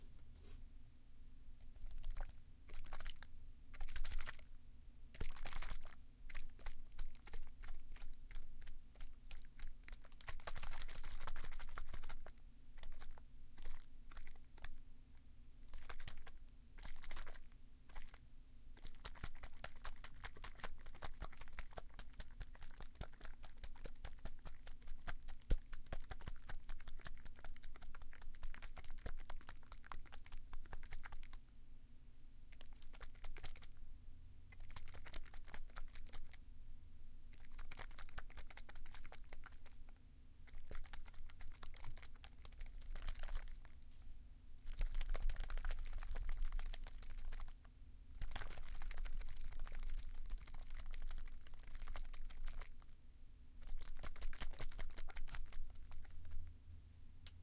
tattoo shaking bottle
The sound of shaking a small bottle with liquid in it